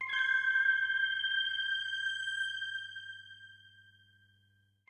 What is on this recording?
Short self composed theme using a RolandE-68 Synthesizer.
gloomy, Halloween, Horror, oppressive, RolandE-68, Synthesizer